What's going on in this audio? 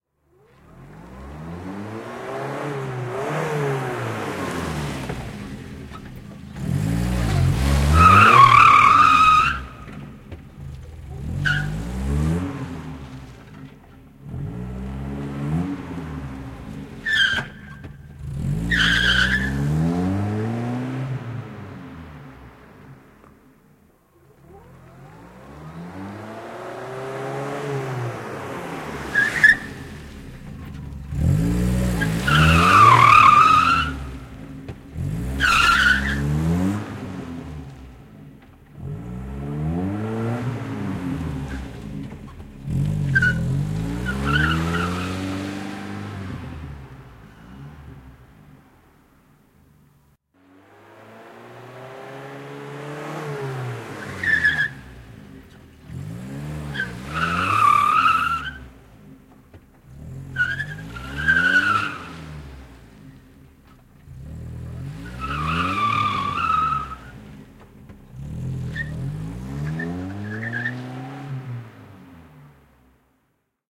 Henkilöauto, jarrutuksia, jarrutus / A car braking, screechy brakings on asphalt, Saab Gli, a 1984 model
Saab 900 Gli, vm 1984. Kirskuvia jarrutuksia asfaltilla.
Paikka/Place: Suomi / Finland / Vihti
Aika/Date: 06.03.1984
Auto, Autoilu, Autot, Brakes, Cars, Field-Recording, Finland, Finnish-Broadcasting-Company, Jarrut, Motoring, Soundfx, Suomi, Tehosteet, Yle, Yleisradio